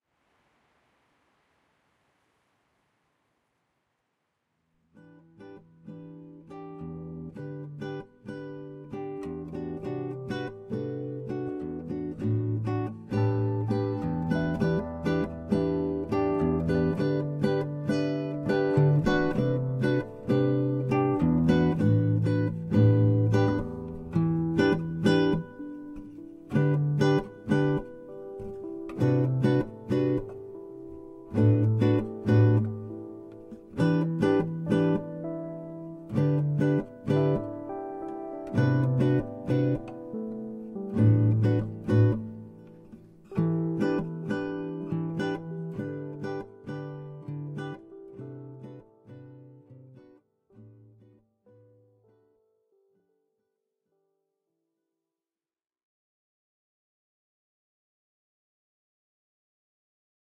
Acoustic Guitar and Keys - Plains Soundtrack
chill, keyboard, ambient, acoustic, relaxed, loop, peaceful, guitar, synth, soundtrack, music, country, keys